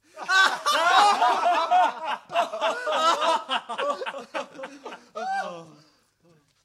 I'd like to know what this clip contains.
Laughter cues recorded with the male members of the cast of the play "Charley's Aunt", July 2019.